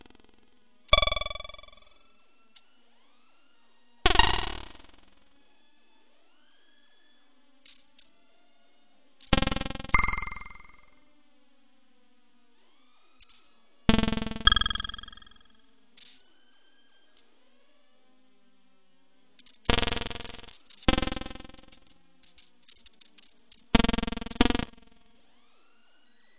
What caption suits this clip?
31-id-terminal l melody
"Interstellar Trip to Cygnus X-1"
Sample pack made entirely with the "Complex Synthesizer" which is programmed in Puredata
ambient, experimental, idm, modular